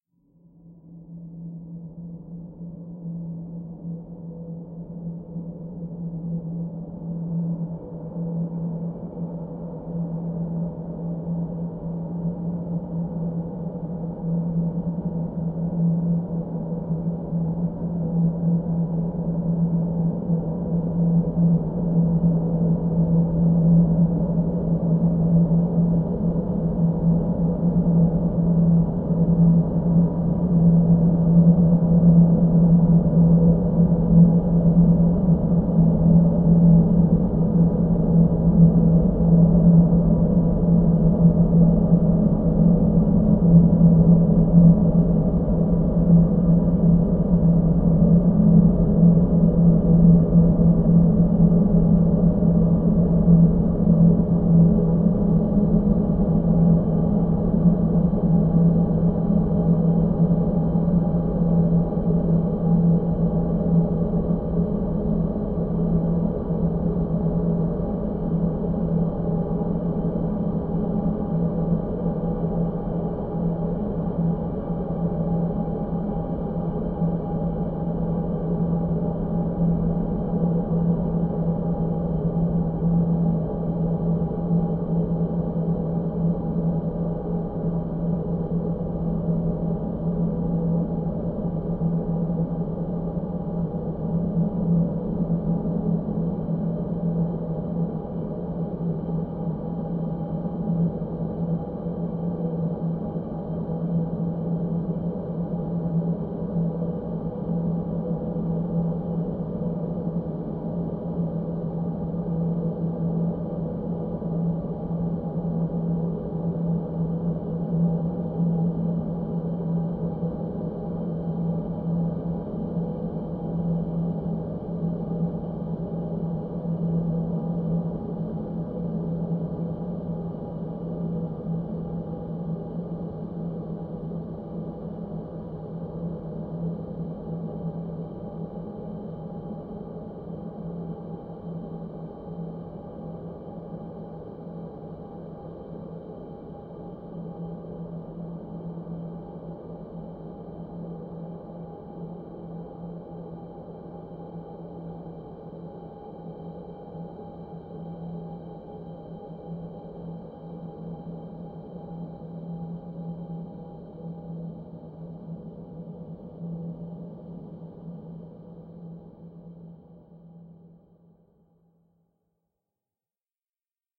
Melodrone multisample 15 - Ice Drone - G#3
1.This sample is part of the “Melodrone multisample 15 - Ice Drone” sample pack. This is a more dark ambiance. Very slowly evolving atmosphere. The pack consists of 7 samples which form a multisample to load into your favorite sampler. The key of the sample is in the name of the sample. These Melodrone multisamples are long samples that can be used without using any looping. They are in fact playable melodic drones. They were created using several audio processing techniques on diverse synth sounds: pitch shifting & bending, delays, reverbs and especially convolution.
ambient, multisample, atmosphere, drone